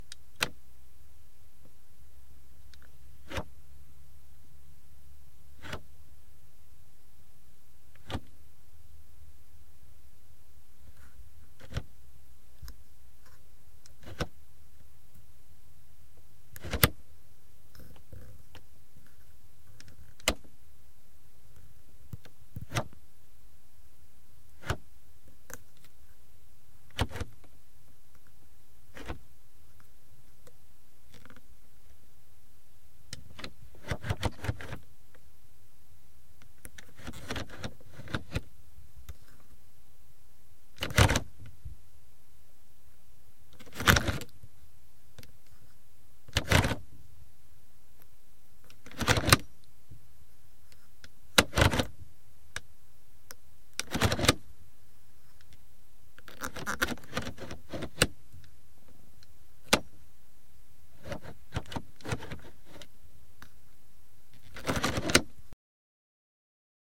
The HVAC position selector knob on a Mercedes Benz 190E, shot with a Rode NTG-2 from 2" away.. The knob is directly linked to some valves that change HVAC flow between the foot wells and the dash vents, which you can occasionally hear moving.